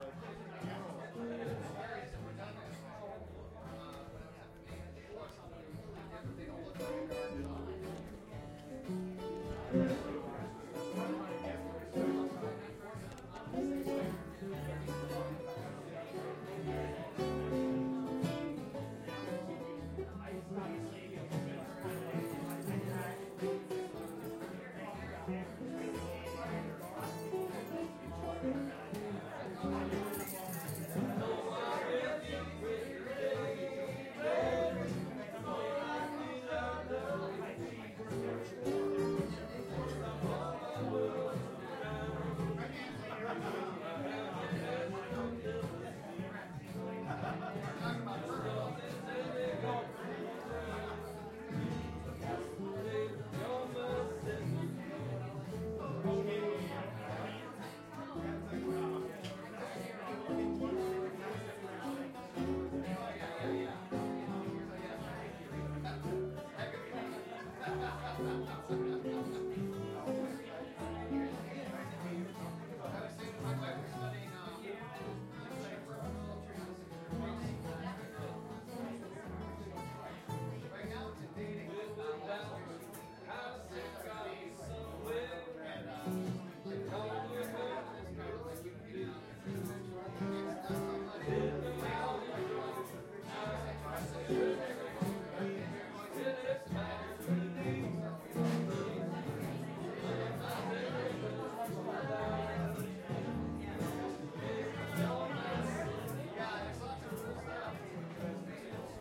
cafe sound music voices
A party with my friends playing their instruments.
cafe, club, night-club